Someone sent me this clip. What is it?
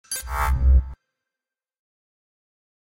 HUD-OPEN02
beep, bleep, blip, click, event, game, hud, sfx, startup